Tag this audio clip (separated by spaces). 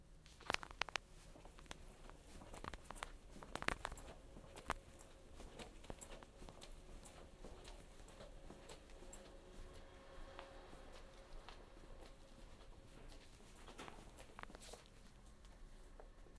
carpet footstep footsteps hallway indoors step walk walking